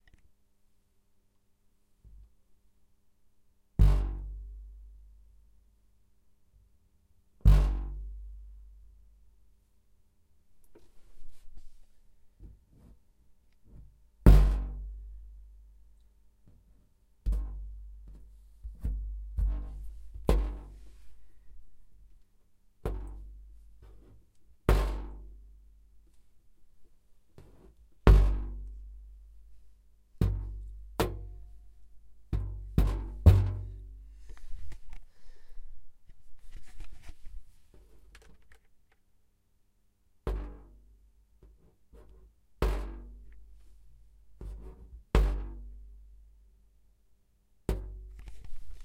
Desktop thump
One take of an old desktop getting slammed by a human fist and creating a short vibration.
desktop, hit